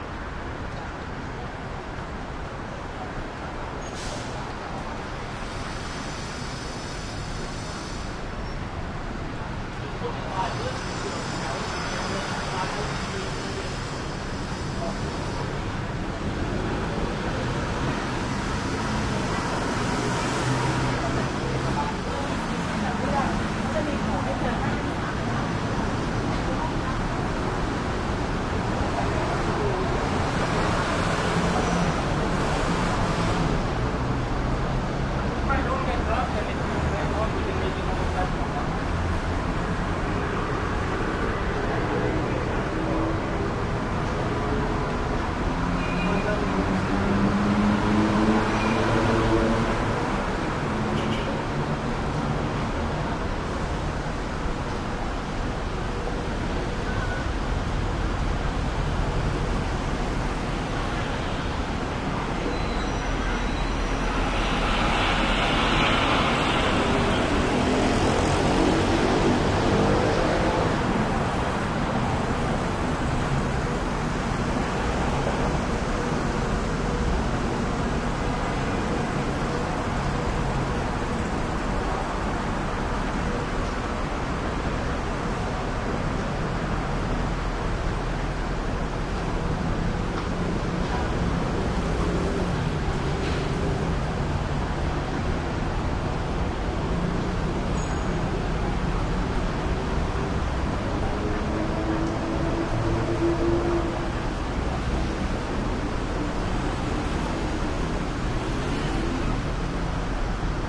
Thailand Bangkok traffic heavy slight echo from Skytrain overpass in multilayered highway2 walking some voices
traffic, Thailand, echo, overpass, Bangkok, heavy